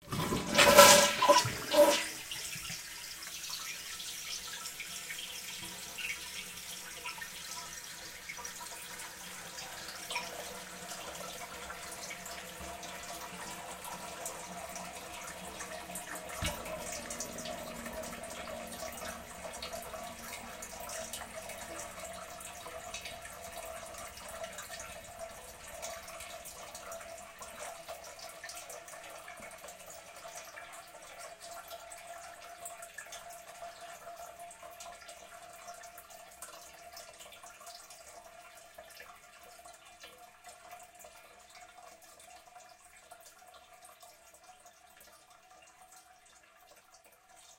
Old toilet sound in a quiet bathroom. Recorded with a Blue Yeti.
bathroom, water